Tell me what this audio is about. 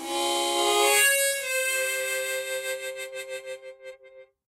Chromatic Harmonica 22
A chromatic harmonica recorded in mono with my AKG C214 on my stairs.
chromatic, harmonica